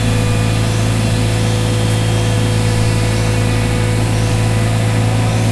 Moteur from construction machine. Use H4n Pro 2018.08